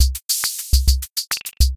Minipops -Rhythm 1
Simple Rhythm,programmed with free Software Bucket Pops.
Minipops, Bucket-Pops, Korg-Minipops, Drummachine